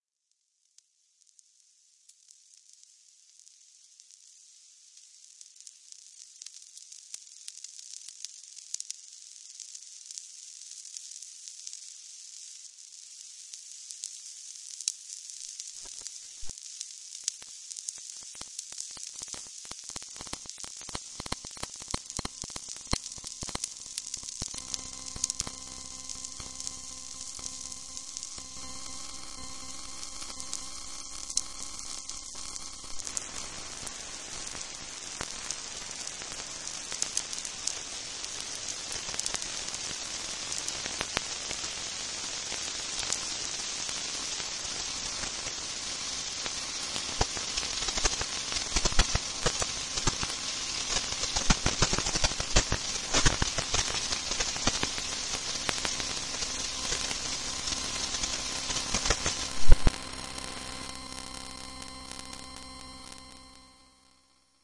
Soft, light clicks simulating rain. Some other vague noises of some creature scuffling around... All sounds were synthesized from scratch.
noise, sfx, minimal, raw, dry, minimalistic, insects, fx, silence, hollow, atmosphere